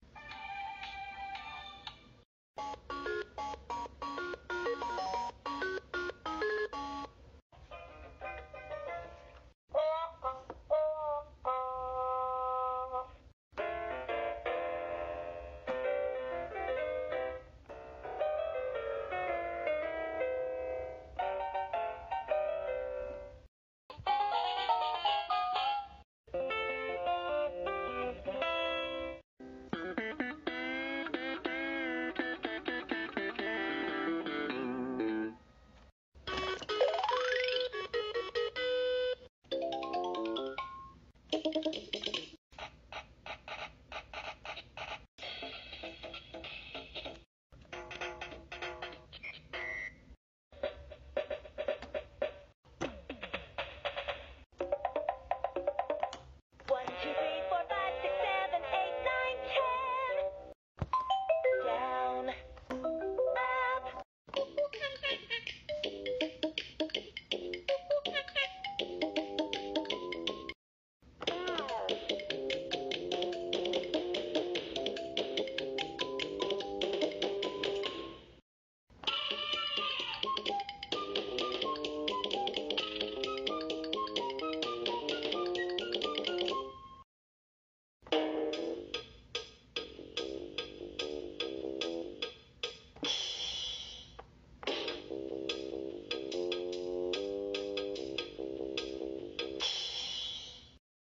Children's Toy Musical Samples
Samples of children's toys playing different music. 4 Piano, 3 Guitars, 2 Vocals, childlike synths and celestas, and a various other drum beats in different styles.
banjo, celesta, children, guitar, kalimba, kid, low-quality, music, piano, riff, sample, sax, steel, synth, toy, violin, vocals